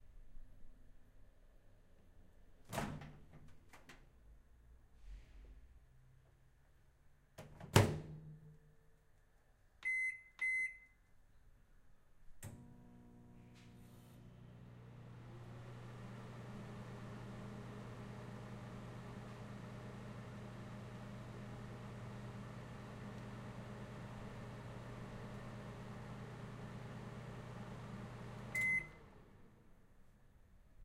sonido de uso de microondas
Cocina, Microondas, operacion